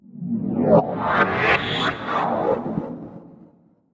ONORO Julian 2014 2015 vortex
Sound recorded of footsteps played in backforwad using 2 tracks
Track1 Pan 20% Rigth
Phaser
Phases 24: Oirginal / modified 128
Frequency LFO 0.4Hz
Depth 100
return: 0%
Track 2
Delay: Delay Type: Regular-Delay per écho -12.5dB - Delay time 0.30 s. Pitch change per echo 0.44 Number of echoes 8
Tremolo: wave formtype: Inverse sawtooth - Starting phase 134 º Wet level 86% Frequency 7,9Hz
Typologie: X+V
Morphologie:
Masse: son cannelé
Timbre harmonique: Brillant scintillant
Grain: lisse
Allure: Mécanique sans vibrato
Dynamique: Attaque nette
Profil mélodique: Variations serpentines ascendantes puis descendantes
Profil de masse: Calibre grave
outerspace, space, teletransportation